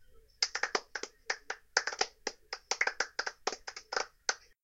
I wouldn't call this "applause". It's sarcastic clapping, by a small crowd (all me) recorded with a CA desktop microphone. Maybe you could use it for a wrong answer audience response for a game show video game.
condescending, clapping, wrong, sarcastic, wow-that-was-bad, clap, bad-audience